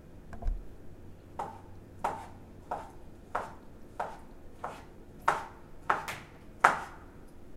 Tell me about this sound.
Knife cutting
board, cut